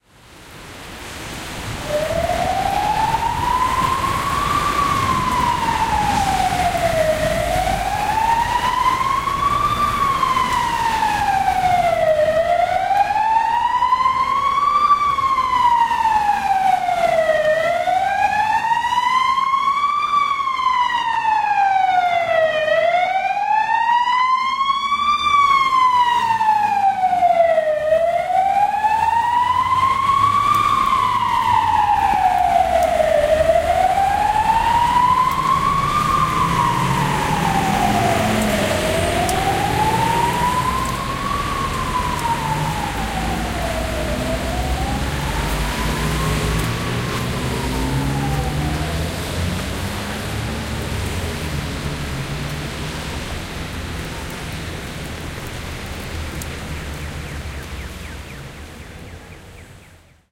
20091229.ambulance.siren
starts with sound of heavy rain, then siren of an ambulance passing very close. Normal traffic that resumes, and beeper in a pedestrian crossing. Soundman OKM into Olympus LS10 recorder. Recorded at Feria and Resolana St crossing, Seville.
ambiance, city, hospital, siren